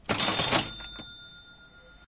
this is a single cash register opening and bell recorded from a turn of the century cash register with a Zoom H2.
Enjoy
kp
2024 if you enjoyed or used this, please take a listen to my instrumental album knewfoulke: abandoned oddities, 50 tracks of background music for any occasion. find it on spot or any purchase site! cheers
turn, century, Cash, Register